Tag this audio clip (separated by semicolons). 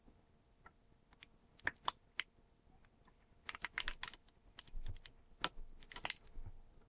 crush; press; seat; smash; soda